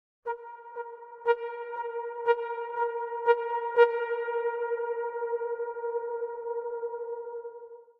Synth trumpet loop (120 BPM)